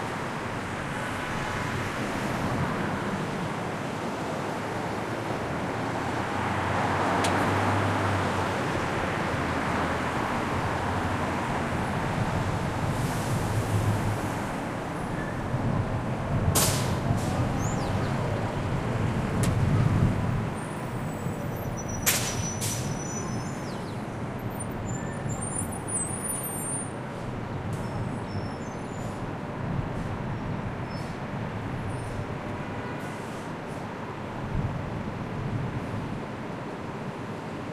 Afternoon traffic on North Carolina Ave. in Washington DC. The recorder is situated on the median strip of the street, surrounded by the rush-hour traffic, facing the Capitol in the southwest.
Lots of cars, buses and trucks passing, heavy traffic noises.
Recorded in March 2012 with a Zoom H2, mics set to 90° dispersion.
city, rush-hour, spring, traffic, USA, Washington-DC